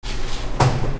0026 Metro ticket open
Metro ticket machine open the door
20120112
ticket, door, korea, metro, field-recording, seoul